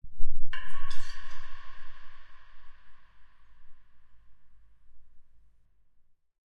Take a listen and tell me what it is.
Metal water bottle SFX - dark droplet
Processing one of the recordings from this pack to create a dark and creepy droplet sound.
SFX, Horror, Droplet, Effect, Water, Drop, Eerie, Drops, Dark, Scary, Metal